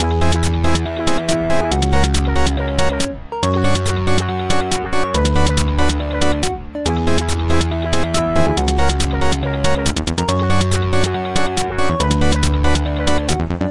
5 - jamiroquai fait du rock 1
First version (2 bars) of my chiptune jamiroquai pathetic tribute (don't know if it's really a tribute, but I was thinking of their first album when I made that little shabby loop). All melodies are made with Synth1 vst.
140-bpm, beat, loop, melodic, music